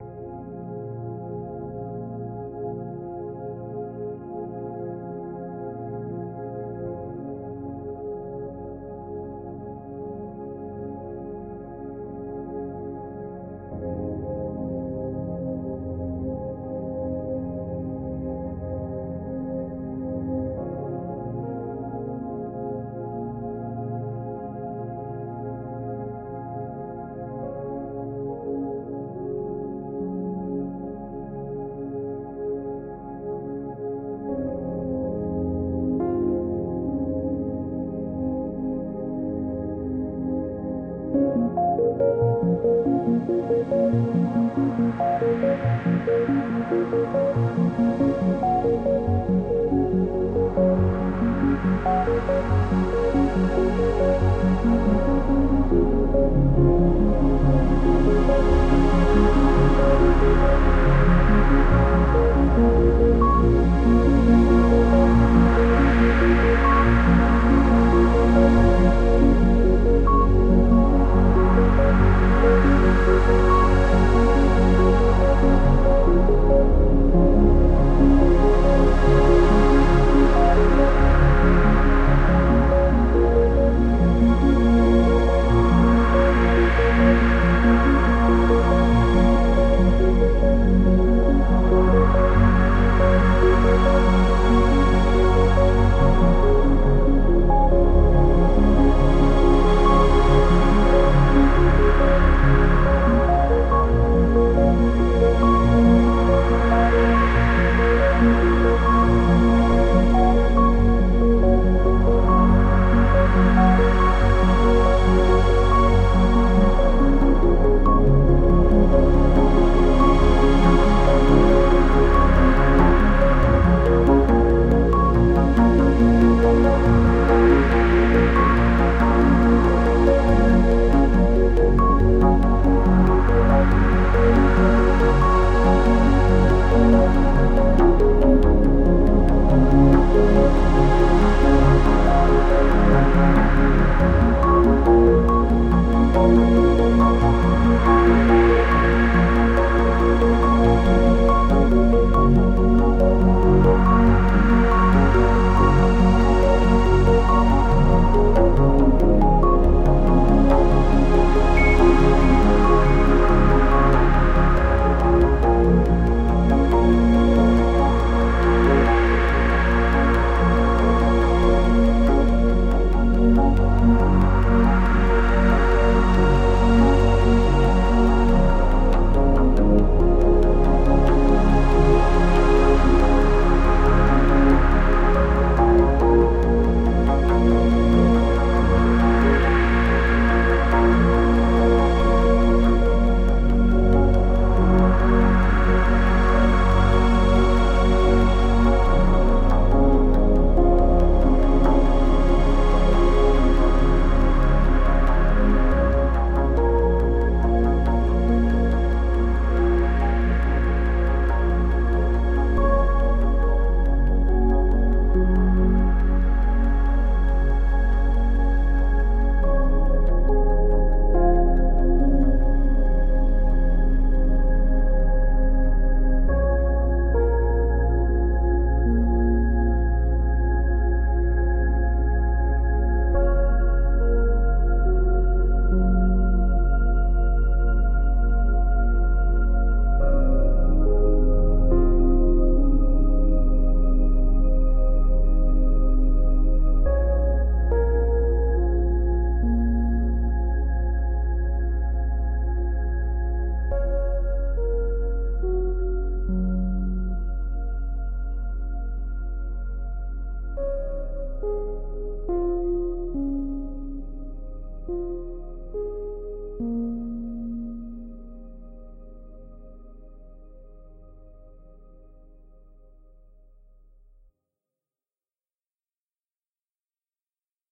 Cosmic Glow track with nice and warm sound. Track features piano. Warm and sad pad. Plugins Sylenth 1, Massive, Zebra 2. BPM 70. Ableton 9, 7 Midi Channels.
Music & Project Files: DOWNLOAD
Regards, Andrew.